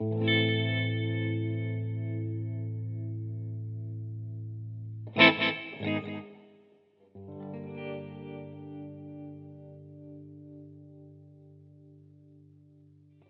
Baby Blue
This is from a collection of my guitar riffs that I processed with a vinyl simulator.This was part of a loop library I composed for Acid but they were bought out by Sony-leaving the project on the shelf.
riff, processed-guitar, vinyl, electric-guitar, guitar